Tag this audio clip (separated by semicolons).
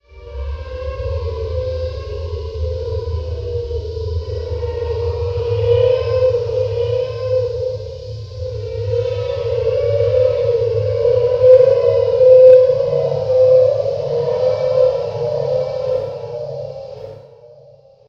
apparitions; spooky; resonating; spirit-world; scary